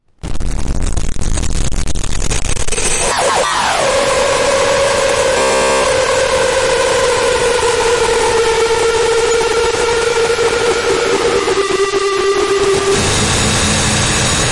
WARNING: This sound is just a little loud.
A spooky noise I made for a map for Garry's Mod. You might be able to deduce that I'm making a horror map. If you want more freaky noises like these, (I may question your sanity) but I'll make one for ya.
creepy, electric, ghost, glitch, haunted, horror, odd, phantom, scary, spooky, strange, weird